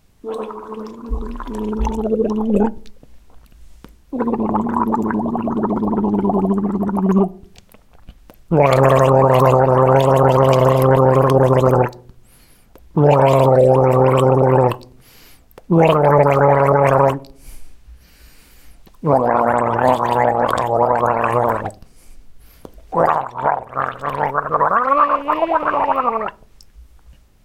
Several gargles I did before recording a voiceover. As usual, I uploaded them in case is useful for anyone.